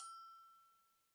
Agogo Bell High Velocity01
This pack is a set of samples of a pair of low and high and pitched latin Agogo bell auxilliary percussion instruments. Each bell has been sampled in 20 different volumes progressing from soft to loud. Enjoy!
bells, cha-cha, hit, latin, percussion, samba